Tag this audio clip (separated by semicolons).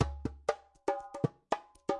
trance; djembe; remo; ancident; africa; tribal; percussion; groove; tribe; drum